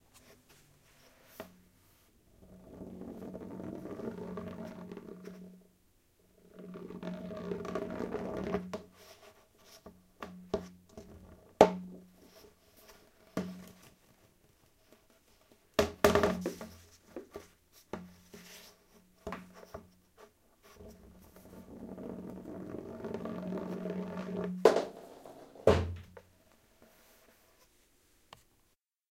Rolling Cylinder In Large Plastic Pipe v2
Bant, Pipe, Plastic, Roll, Rolling